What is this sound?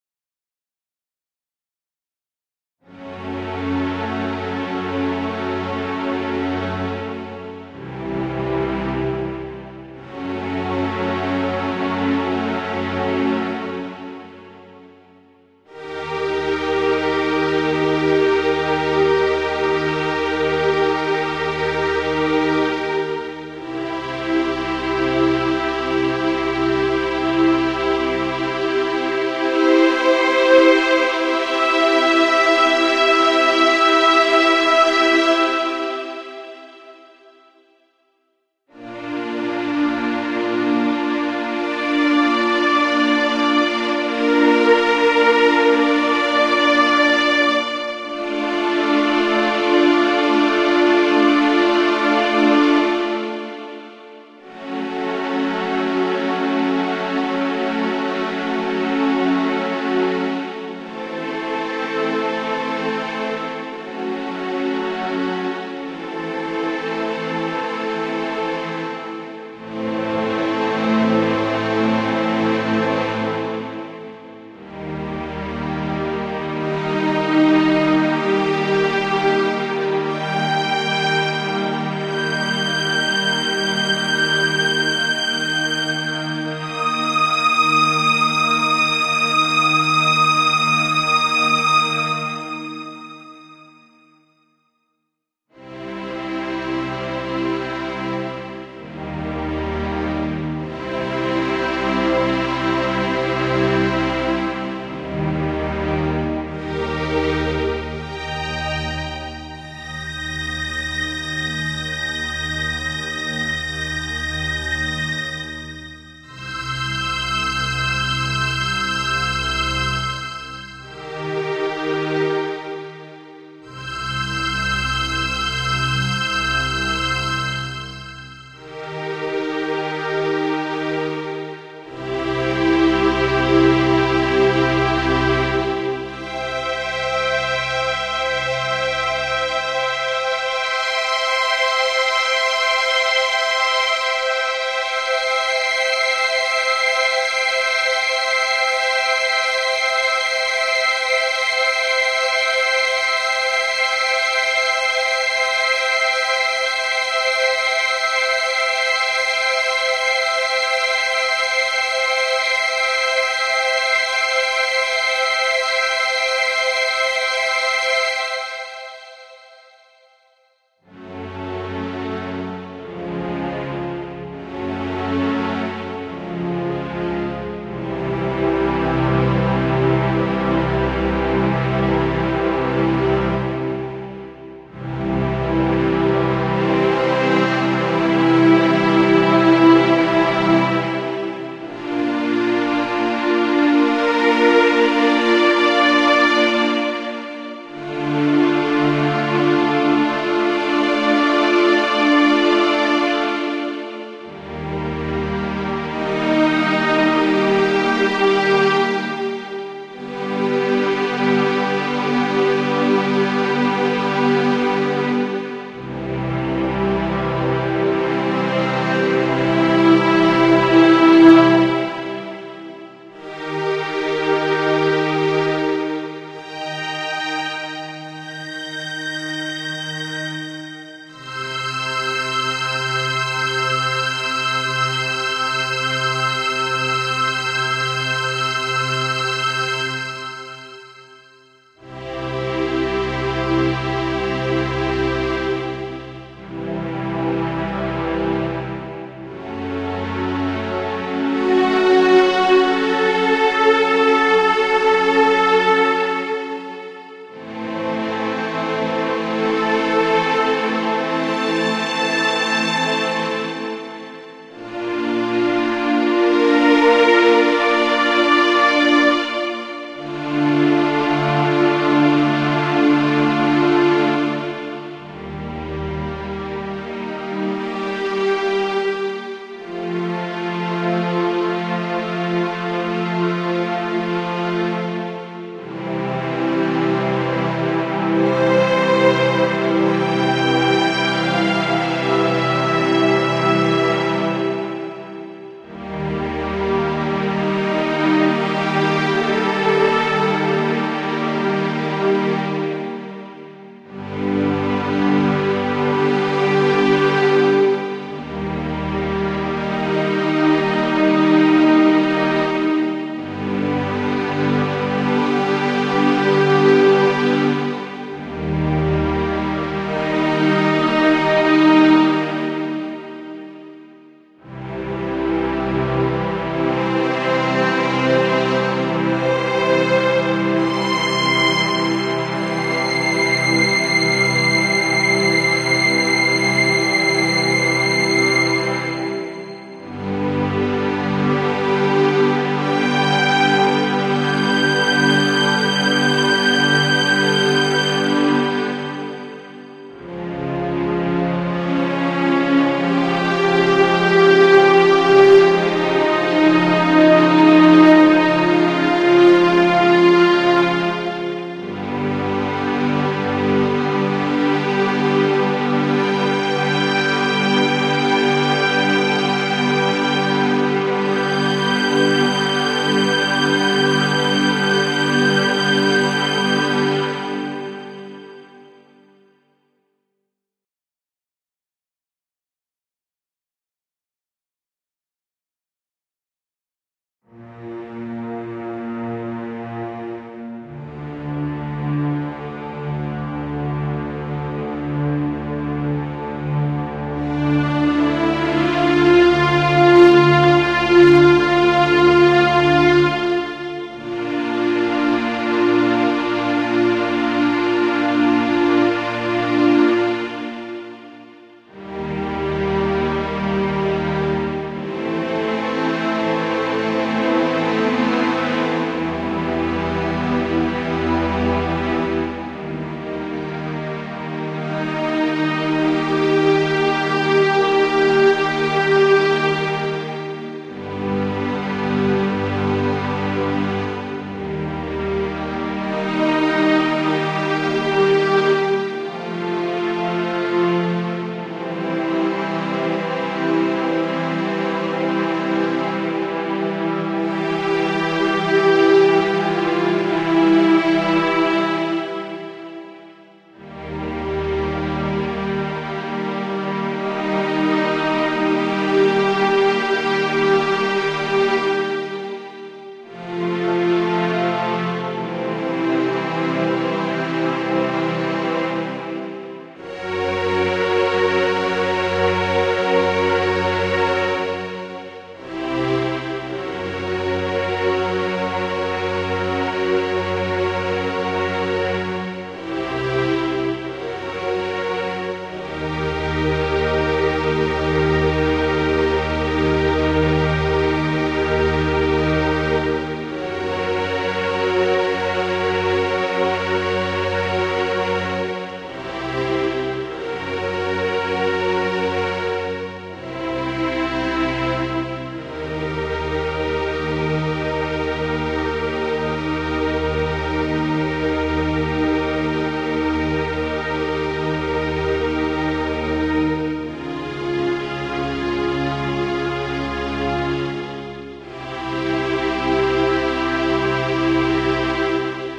classical strings
i did this on keyboard hope you like it have a nice day :)
movie, film, love-film, org, orchestra, strings, cinematic, slow, orchestral